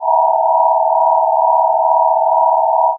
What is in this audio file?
Random Sound created with SuperCollider. Reminds me of sounds in ComputerGames or SciFi-Films, opening doors, beaming something...
sound, ambient, ambience, supercollider, atmosphere, electronic, horn, sci-fi